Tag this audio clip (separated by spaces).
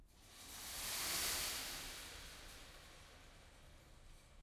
attack long tail